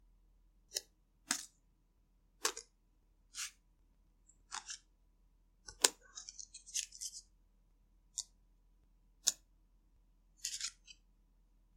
Multiple debit cards snapping and sliding
card, clack, credit, debit, effect, fx, interact, move, object, sfx, slide, snap, sound, sound-effect